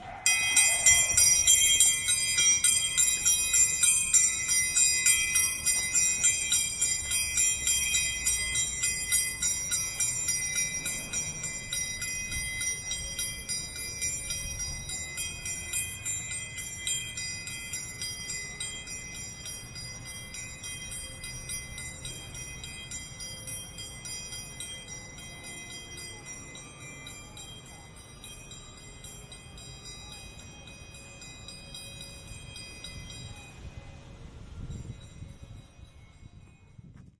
Cookie triangle traditional
The metal-triangle of traditional street cookie vendor passing by.
Recorded with a Sennheiser Ambeo Smart Headphones.
Normalized, hum and hiss reduction with Audacity.
binaural, Mexico, Spanish, street-trades, traditional